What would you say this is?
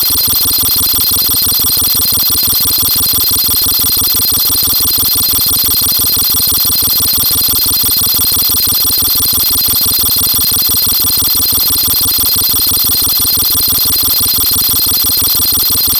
High Freq Processing
CMOS, digital, element, modular, Noisemaker, processing, production, synth